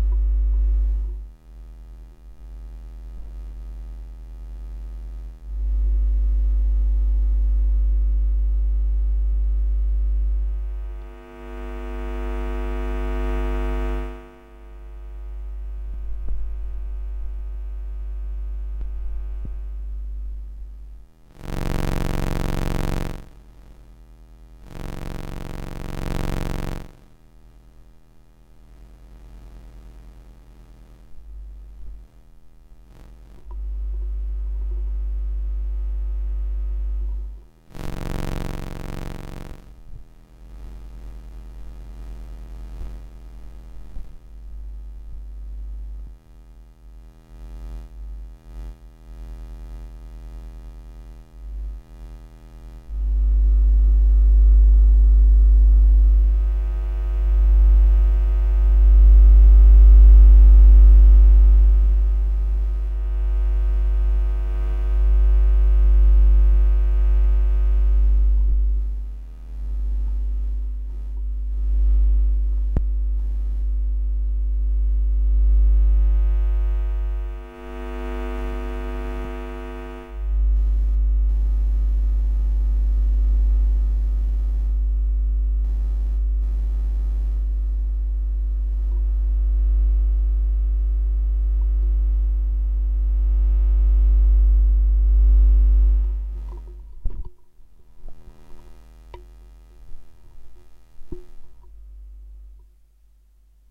Live record of noise and hum from my computer. Recorded EMI radiation...